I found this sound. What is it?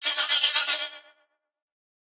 Mechanical creature saying something
mechanic, creature